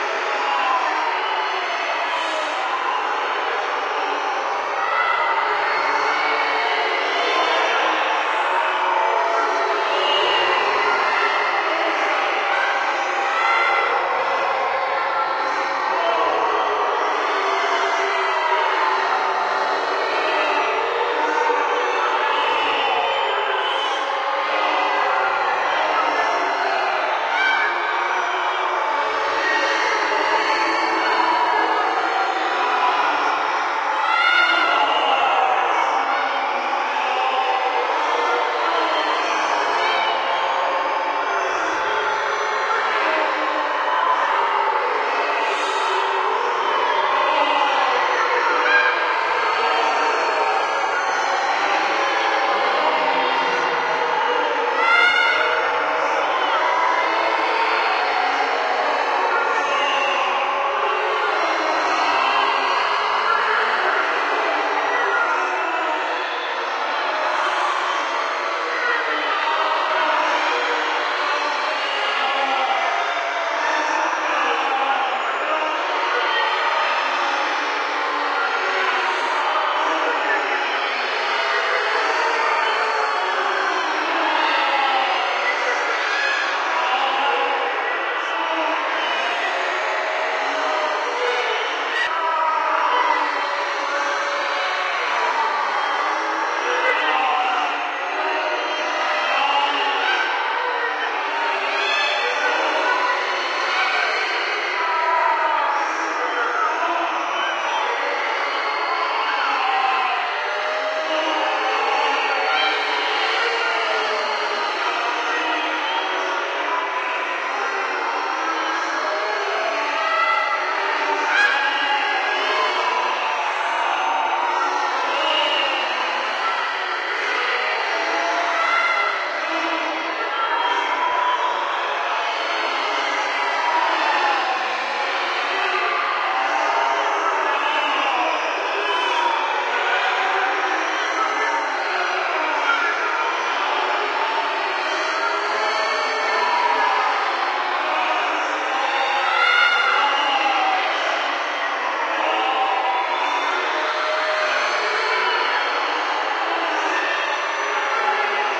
A more effective attempt than UnrelentingAgony1, this time with an improved AnalogBox circuit and fewer artifacts. It's a mix of 3 separate runs of an AnalogBox circuit I designed to sound just human enough to be disturbing. The first one I put into my "musical" pack but this one has less of the background "singing" and so I'm going to put it in the Backgrounds pack instead. Also, this file is a seamless loop, unlike the first attempt.
Warning: any sensitive person should avoid listening to this. Most people will find it overwhelming to listen to the entire duration of the clip (my apologies to the moderator who gets to approve this). Just keep reminding yourself that it was generated entirely by a virtual synthesizer circuit. No humans or other living creatures or any recorded sounds were used in producing this sound, despite what you may think you hear. It's lots of oscillators and filters, some delays, etc., with the generated bytes written to the disk drive. That's all.
abox, anguish, crying, despair, hell, horror, loop, sadness, singing, suffering, torment, vocals, wailing